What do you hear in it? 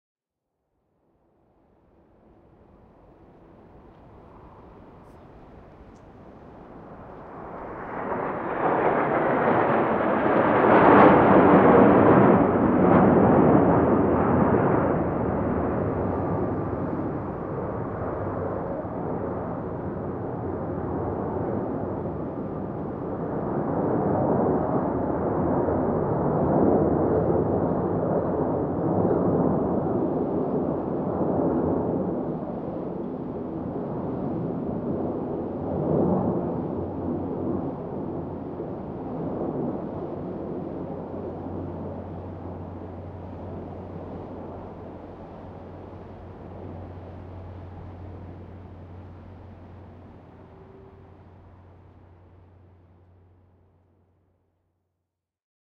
ps flighby highgain 02
The whole group making a slow pass. This one is pretty clean regarding the wanted sounds.
I recorded this one with a higher input gain